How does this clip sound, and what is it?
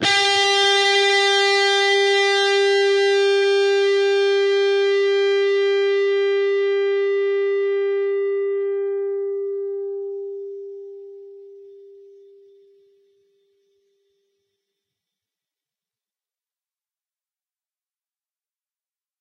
G (3rd) string, on the 12th fret.